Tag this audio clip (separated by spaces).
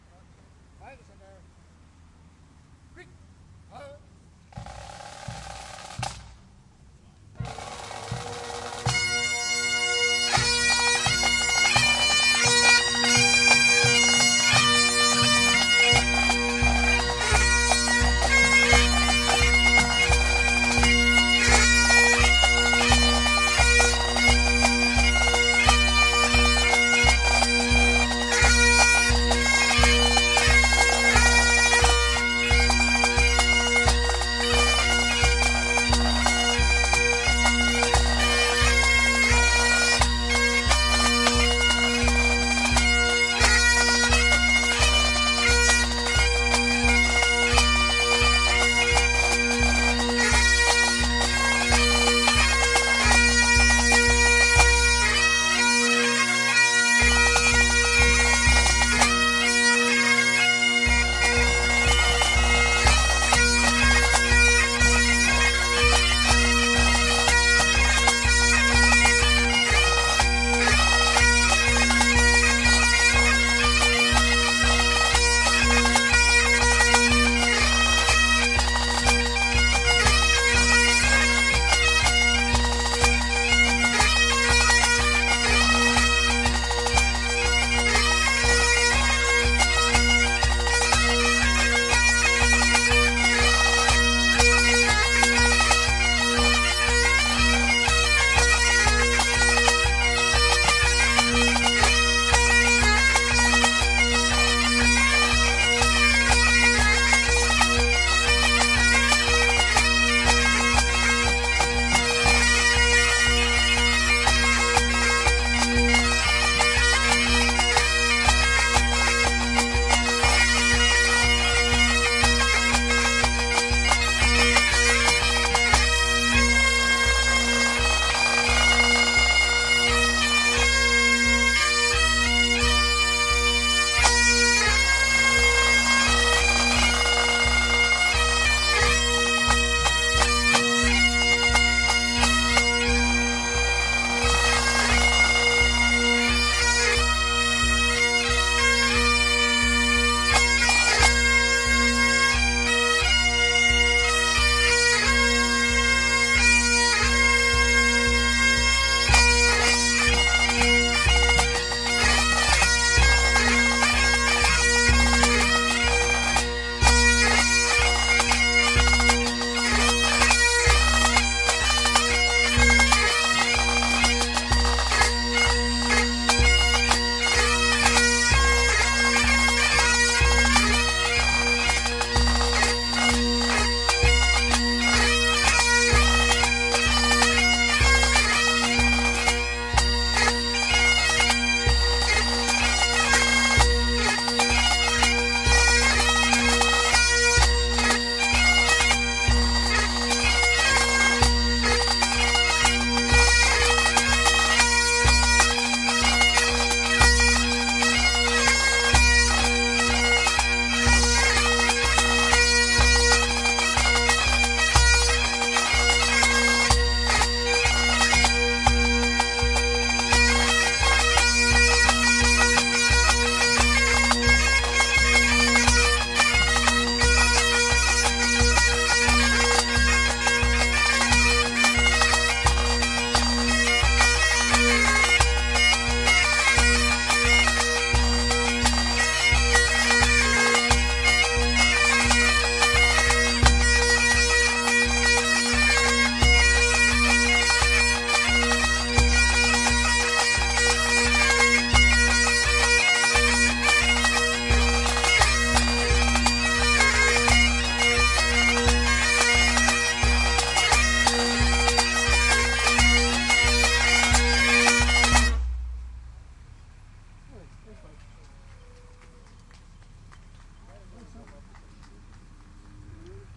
bagpipes band